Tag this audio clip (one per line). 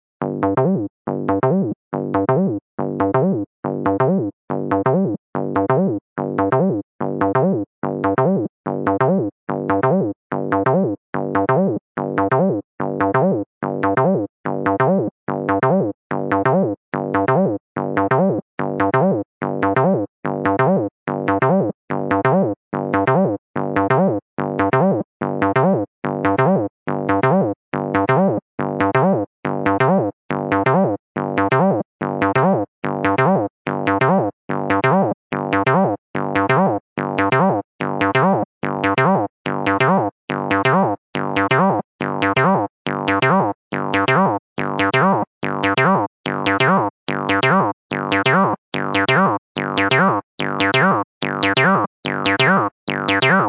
riff
acid